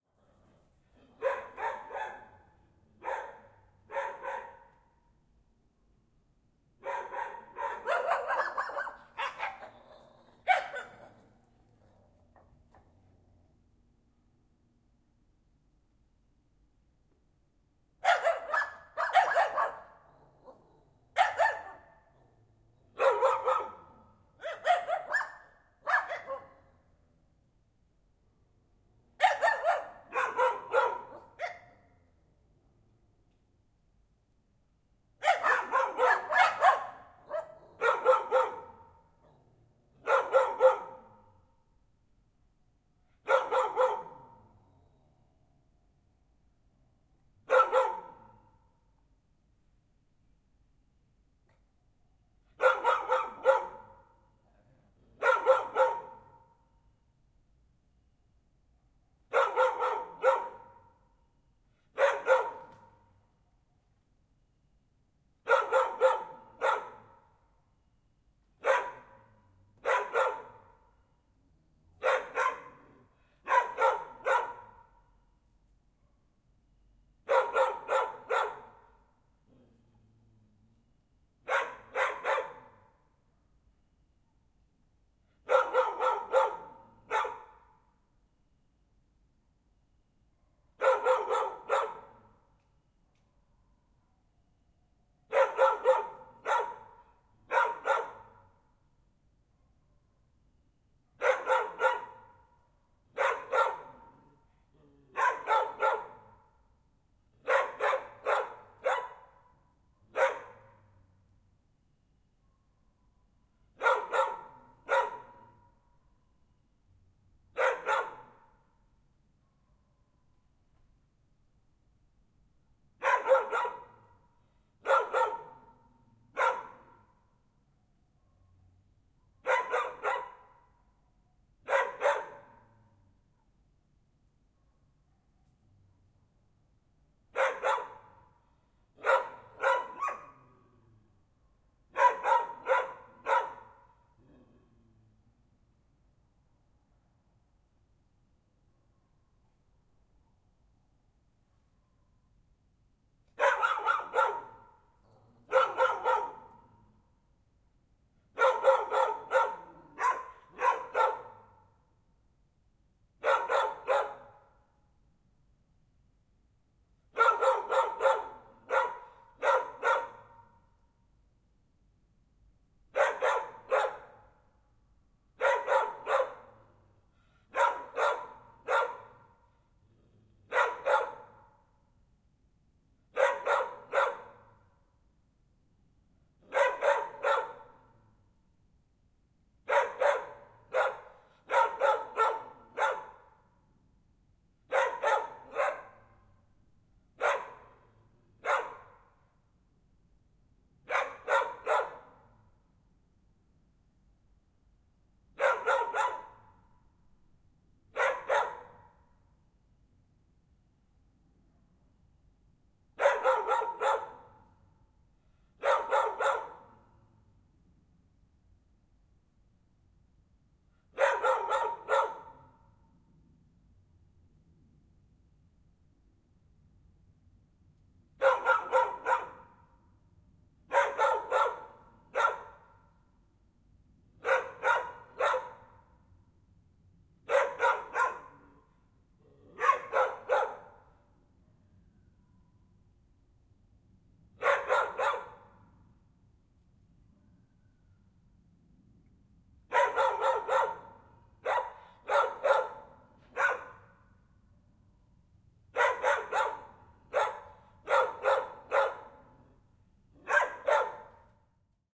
ATMO EXT - Quiet night, dogs are barking
Dogs, Night